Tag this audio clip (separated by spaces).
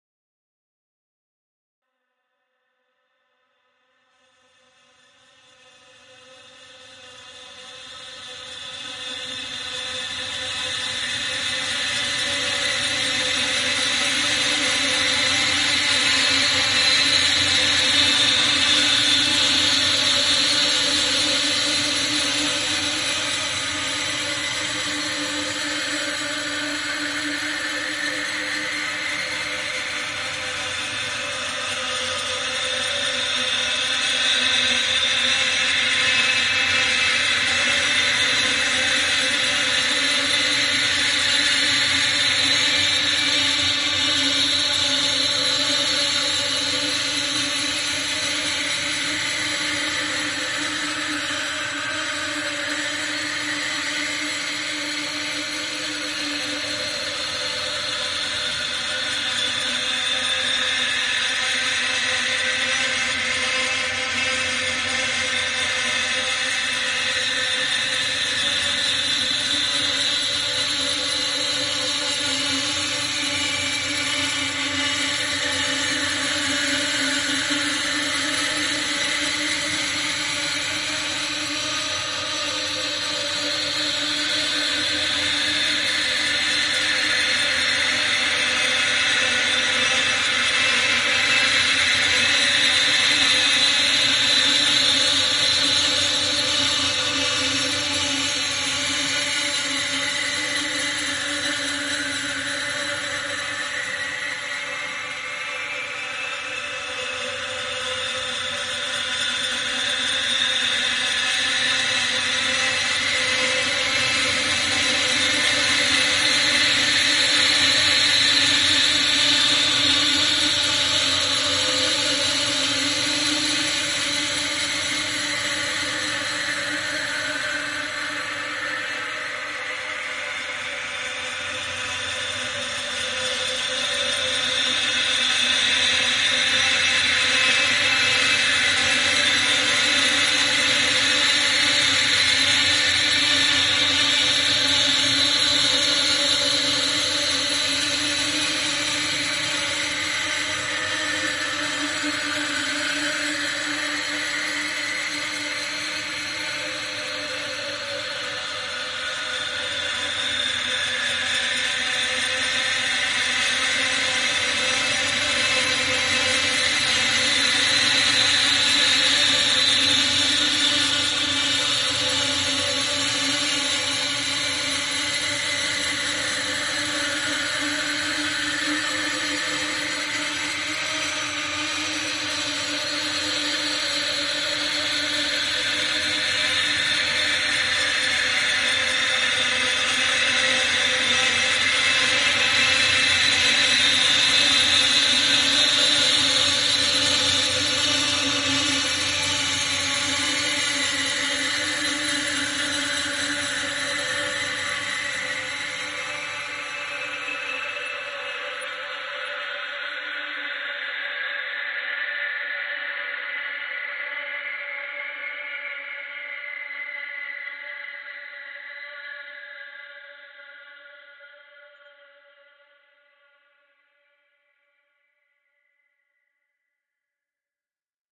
insects hive drone beehive hornets bugs bees wasps swarm